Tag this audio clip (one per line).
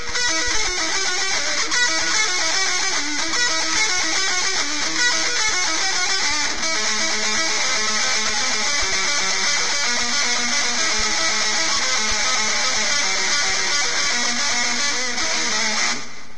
Electric-Guitar
Guitar
Melodic
Riff